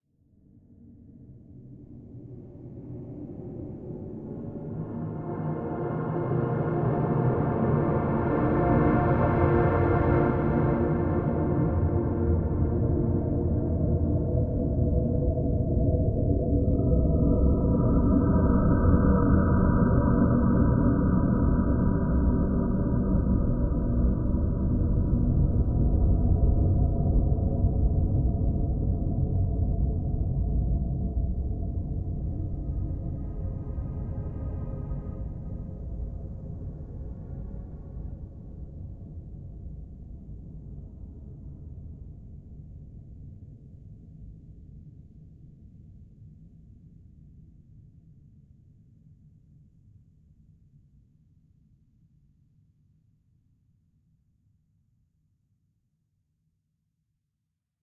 Dark Emptiness 022
ambient, atmo, atmosphere, cinematic, creepy, dark, deep, drone, effects, experimental, film, flims, game, oscuro, pad, sound, soundscapes, tenebroso